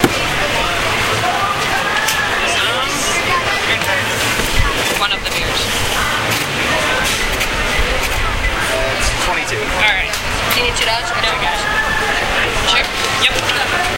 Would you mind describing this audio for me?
Part 2 of buying a beer at coney island stadium
stadium, transaction, baseball, beer